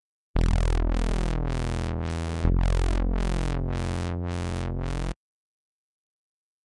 Its a little wubie for you try using it in a song :P